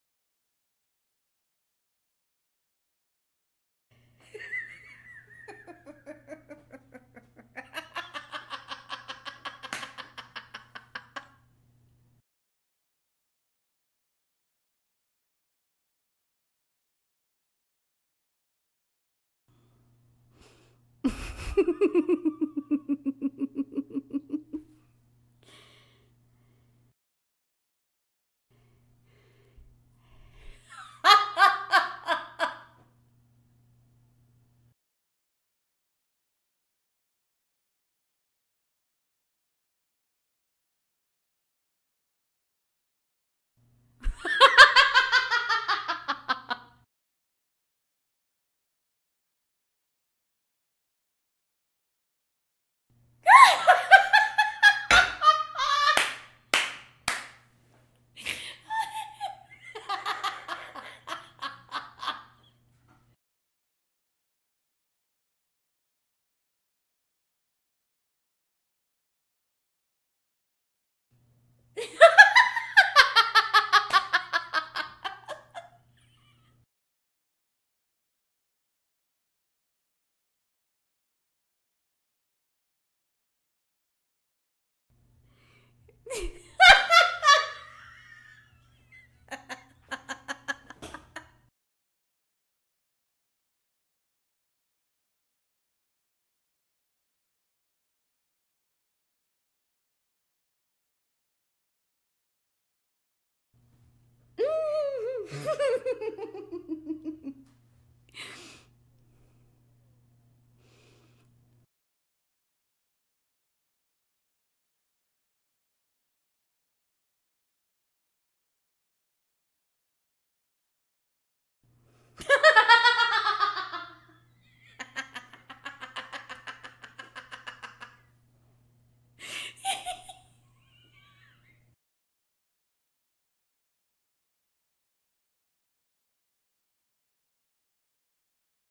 A recording of me laughing naturally in various ways.